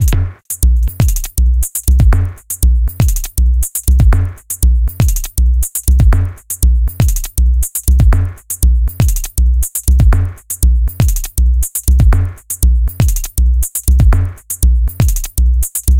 Loop created in NI Reaktor
glitch, loop, reaktor